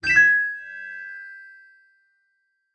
Start Sounds 007
Start Sounds | Free Sound Effects
arcade, games, indiedb, IndieDev, stars, video